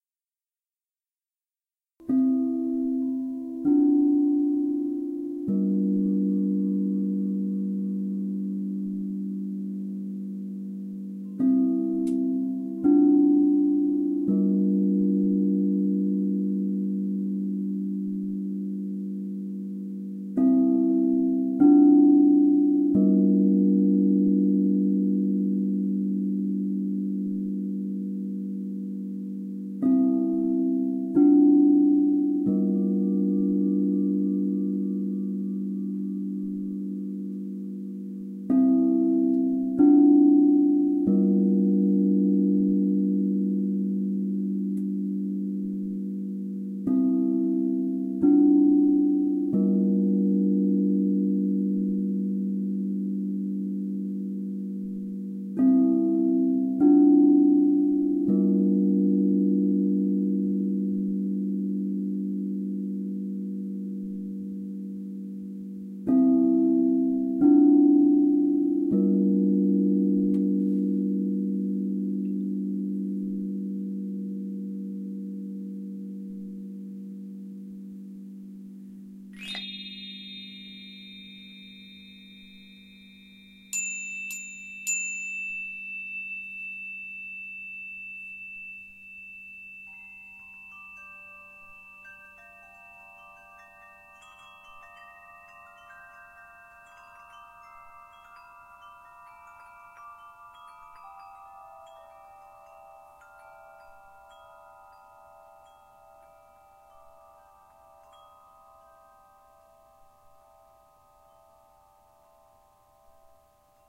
Multiple Crystal Bowl Rhythm
This simple little rhythm emerged through me in a crystal bowl session this week. 6 bowls of different notes, 2 hands, and 2 large gong strikers - pretty much all the bowls/notes vibrating at once (a sound healing journey). Captured on Zoom H4N via onboard mics, centered between the bowls, 8 feet in front, 2 feet above floor.
singing, bowls, crystal, tones, vibrations